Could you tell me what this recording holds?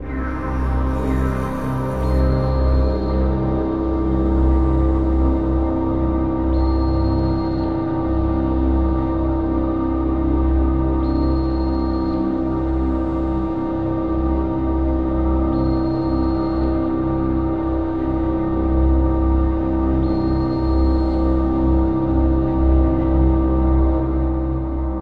padloop experiment c 80bpm